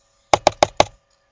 This sound is part of my windows sounds pack. Most sounds are metaphors for the events on the screen, for example a new mail is announced by the sound of pulling a letter out of an envelope. All sounds recorded with my laptop mic.
asterisk, metaphor, recorded, vista, windows, xp